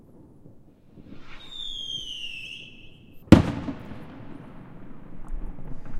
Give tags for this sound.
explosion; firework; new-year

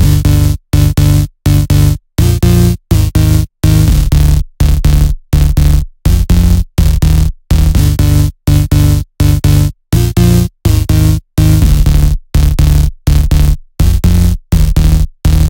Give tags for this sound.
brightness; crystal; lead; maximus; mv; turtles; vst

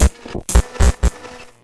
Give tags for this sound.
sci-fi; effect; digital; electric; lab; hi-tech; fx; soundesign; cyborg; electro; transformers; noise; glitch; robot; mutant; computer; soundeffect

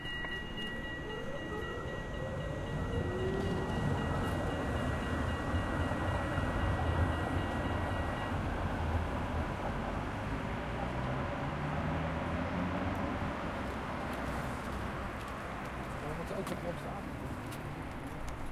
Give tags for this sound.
intersection; bells; light-railrail; field-recording; departs; auto; tram

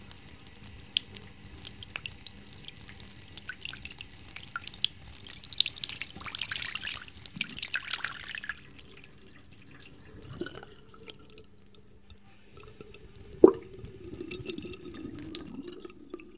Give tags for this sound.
drip; dripping; drop; drops; liquid; Sink; splash; trickle; water

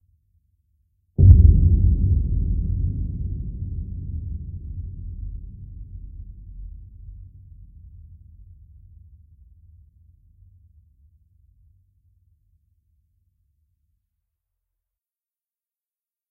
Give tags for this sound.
bass; bassy; big; boom; cataclysm; deep; explosion; heavy; large; low; rumble; shaking; thunder; violent

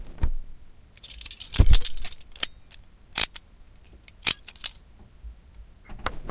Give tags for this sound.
field-recording; police

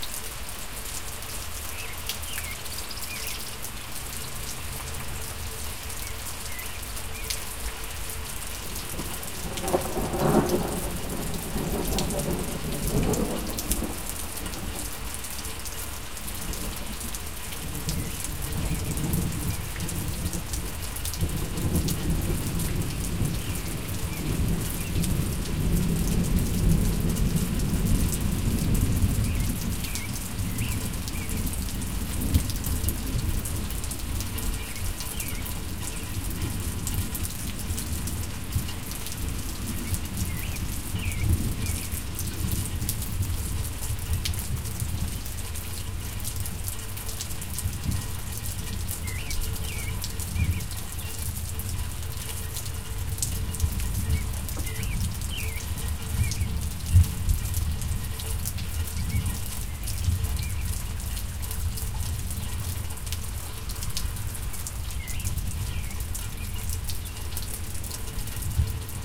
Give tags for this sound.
calm; ambient; nature; thunderstorm; weather; distant; field-recording; rumble; thunder-storm; thunder; wind; storm; water; atmosphere; ambience; wet; lightning; raining; rainstorm; rain

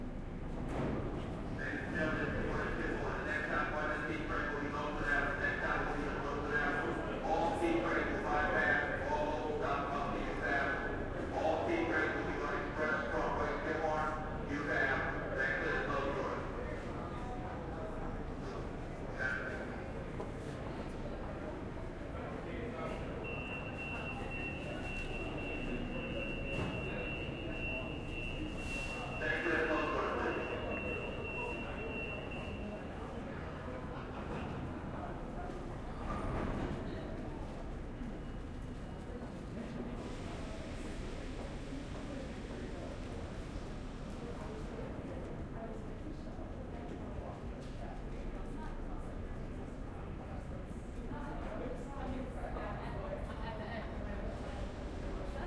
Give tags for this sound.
Zoom; MTA; field-recording; subway; H4n; NYC

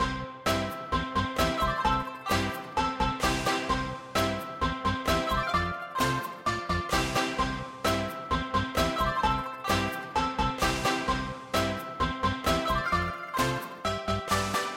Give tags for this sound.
asian; cartoon; chinese; fun; jingle; loop; music; seamless

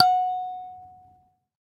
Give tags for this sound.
sanza; percussion; african; kalimba

